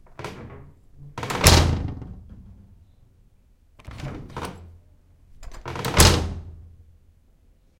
door wood old with inlaid glass close hard rattle or window pane shutter
close, door, glass, hard, inlaid, old, or, pane, rattle, shutter, window, wood